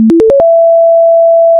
tones of victory
A very old-school victory-like sounds. Basically a bunch of tones in Audacity! yay!
8bit, tone, victory, melody, sine-tone, lcd-game, sine